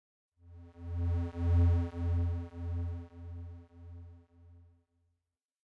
the strange effect when you spend a radioactive thing in front of the camera
camera, experiment, radioactive, backgroung, effect, illbient, creepy, soob, spend, suspence, soundtrack, when, a, spooky, score, soundesign, front, film, thing, strange